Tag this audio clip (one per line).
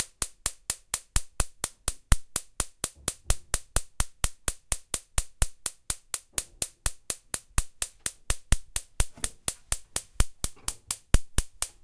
clicks
electric
kitchen